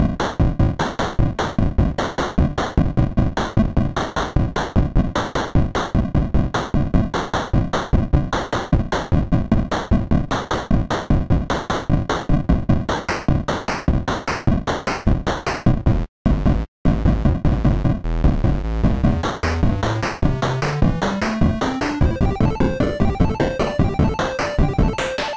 Pixel Song #5
loop, Pixel, music